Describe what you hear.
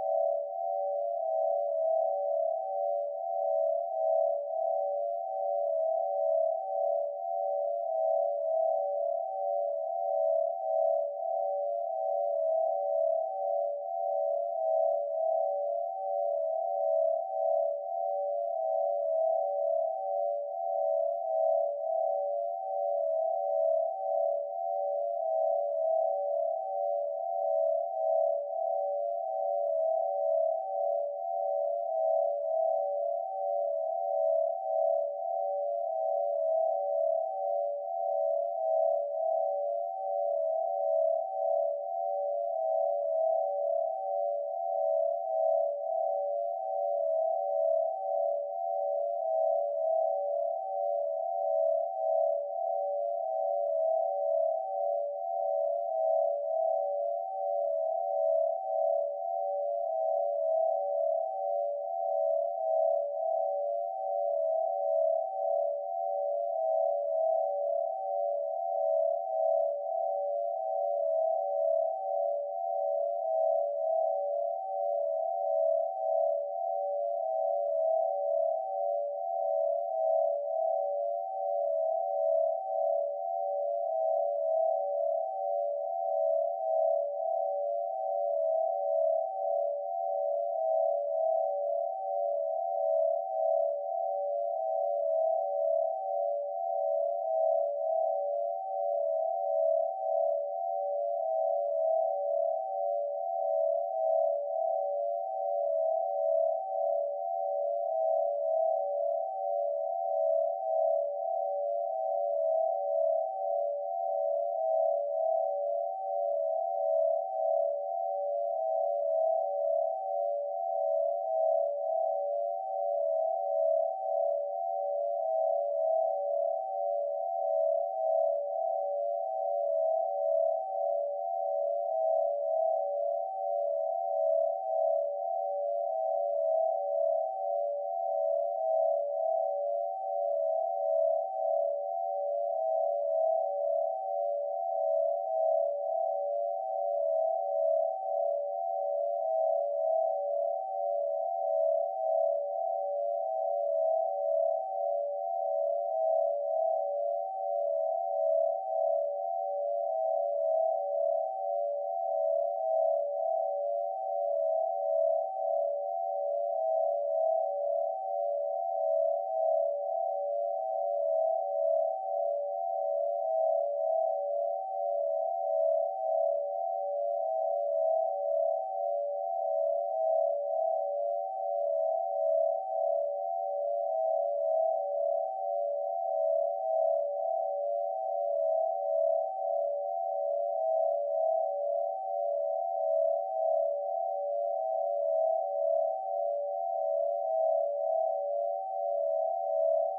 Imperfect Loops 05 (pythagorean tuning)
Cool Loop made with our BeeOne software.
For Attributon use: "made with HSE BeeOne"
Request more specific loops (PM or e-mail)
ambient, sweet, loop, electronic, background, experimental, pythagorean